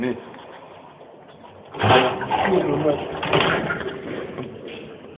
door squeak 28 03 11 12
Door Squeak, people moving, door shut
move, shut, people, squeak, door